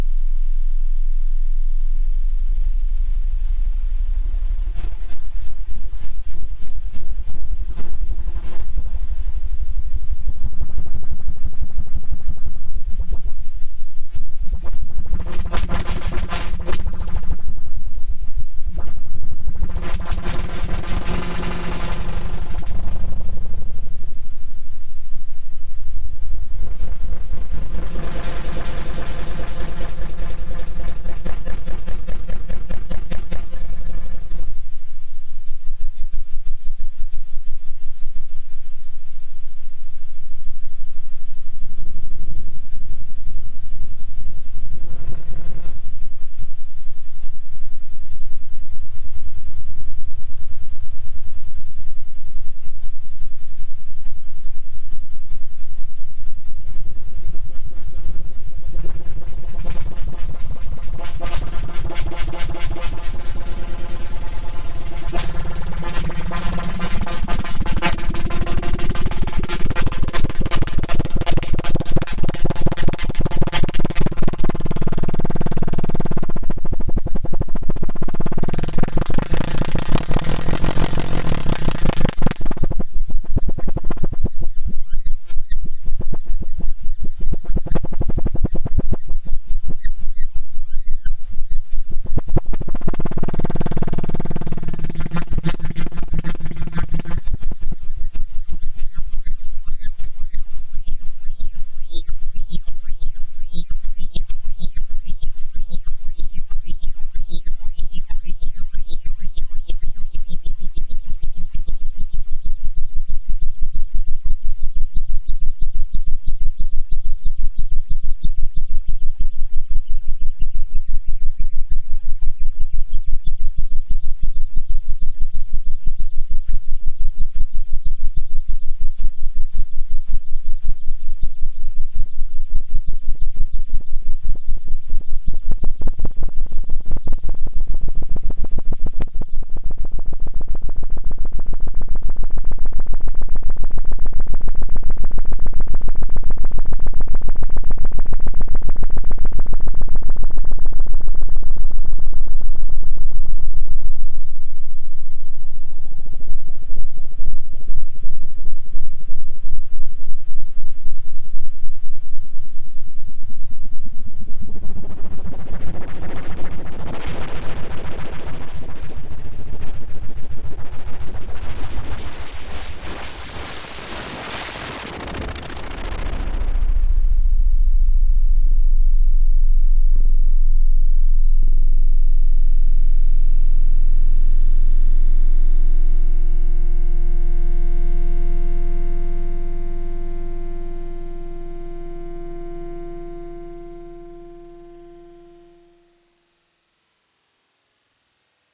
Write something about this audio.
Flange Feedback
Sound experiment: A flanger on a feedback loop.
abstract, digital, electronic, experiment, glitch, lo-fi, modulation, noise, sci-fi, sound-design, synthesis